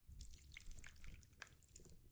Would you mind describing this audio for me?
Blood Droping
Smashing Some Wet Popcorn and sounds like this.
using the ZoomH6 Recorder.